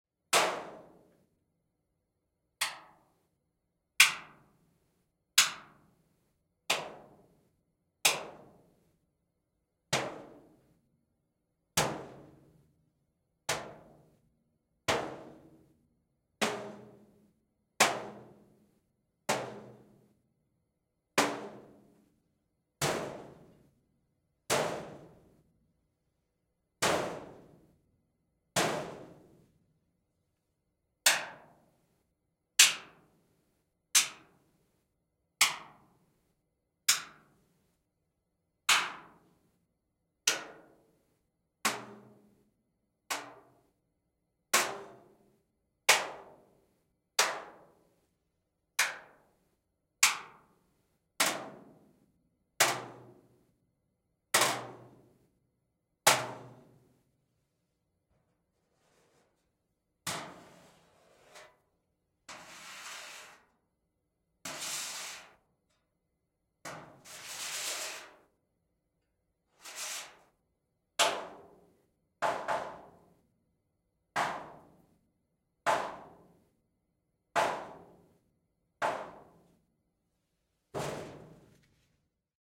Hitting a metal vent with various objects in various places.
vent, impact, percussion, metal